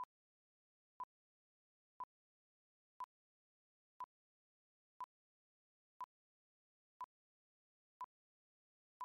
1kHz tone (-20 dBFS) length of 1/30 of a second (one frame) every second for ten seconds
2pop, pop, 1kHz, beep, countdown, tone